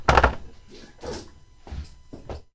mono field recording made using a homemade mic
in a machine shop, sounds like filename- plastic buffing machine
field-recording, machine, metallic, percussion